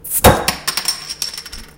Bottle cap falling onto the linoleum countertop in the kitchen. Little fizz sound, but mostly the bottle cap falling.
alcohol, beer, beverage, bottle, cap, clink, cola, countertop, drink, drop, fall, linoleum, open, soda